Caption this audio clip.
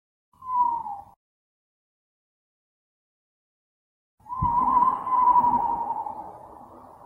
Owl hooting somewhere outside. Sorry if the recording isn't wonderful, just recorded on my phone